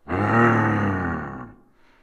roar subdued
Recording of a roar used in a computer game for a monster. This is the more subdueed version of three alternating sounds. Recorded with a Sony PCM M-10 for the Global Game Jam 2015.
computer-game, effect, game, monster, roar, sfx, subdued, video-game